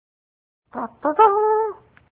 This is a fanfare sound that I need to make better... if anyone could help make it sound like an actual trumpet, that'd be great. Thanks!
fanfare, hand-played, medieval